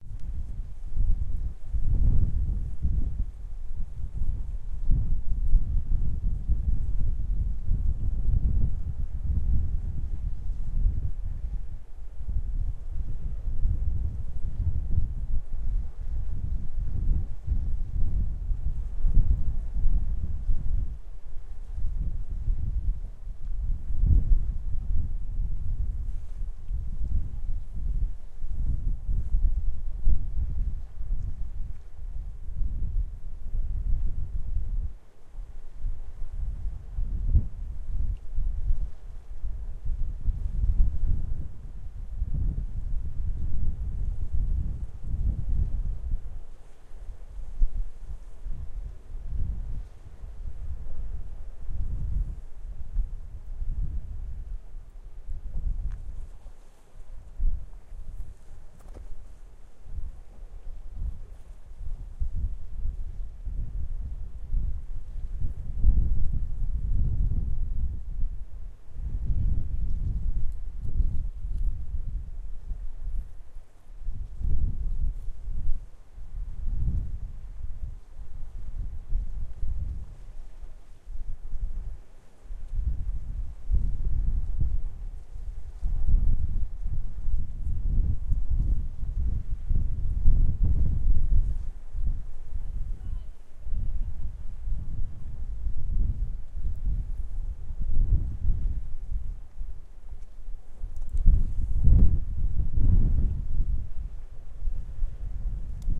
Some recordings of Menorca in vacations the last summer.